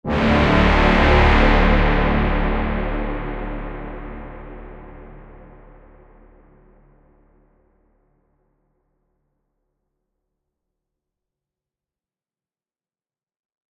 My take on the 'Cinematic Horn', also known as 'braaam', you've heard in the last 1000 movie trailers. Ridiculously aggrandizing and dramatic, the sound, in all its different shades, is ubiquitous in Hollywood and worldwide production. Not that Hollywood tends to aggrandize things, does it??
This is a D note in 2 octaves.
(Please note, you'll not hear those subtle strident frequencies in the downloaded version. They're due to preview's compression.)